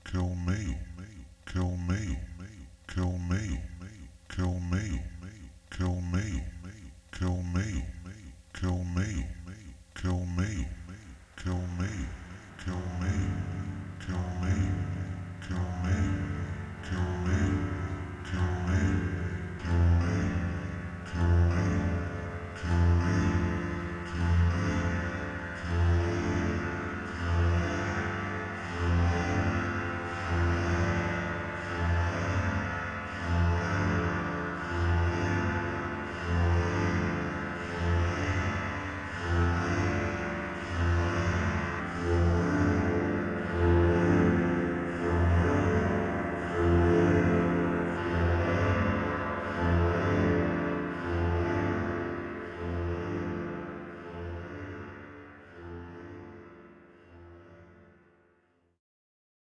"Kill me". Part of my "Death be not proud" sample pack which uses words from the poem by John Donne to explore the boundaries between words, music, and rhythm. Read by Peter Yearsley of Librivox.
ambience, electro, electronic, music, poetry, processed, synth, voice